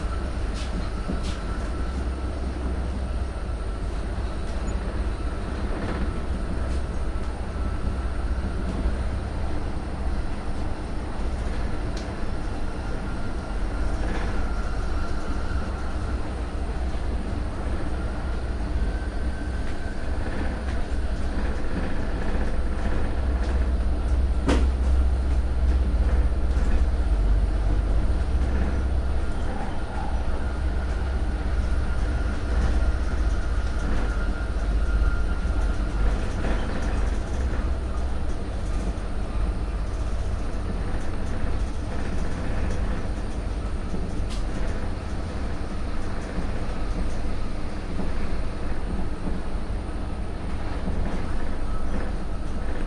Train int moving swedish train no passengers
Recording of a Swedish train journey. No passengers only internal train noise.
Equipment used: Zoom H4 internal mics
Location: Blekinge, Sweden
Date: 19 June 2015
inside, Swedish, Train